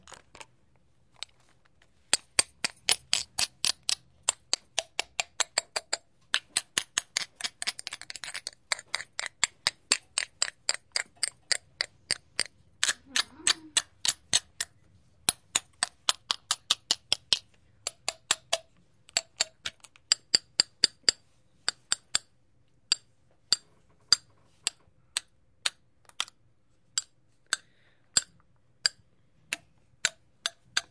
Mysound-IDES-FRjam jar
A pupil 'plays' a jma jar by stroking and tapping it with it's lid.
lid, school, jar, Paris, FranceIDES, jam